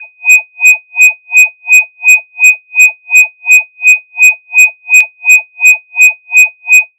CASSOU Chloe 2018 bombe sec
On Audacity, I created a new audio station with a duration of 7 seconds .. With the Wahwah effect and some adjustments the audio track has become sinus that is to say it is composed of low and high. The sound is reminiscent of a bomb timer that is ready to explode. Fades in opening and closing can feel the stressful atmosphere created by the effect. In the end, one wonders what will happen ... .. A net stop allows to return to reality.
bombe, clock, echo